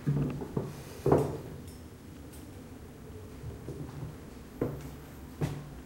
Soft Step in Wood
Suspense, Orchestral, Thriller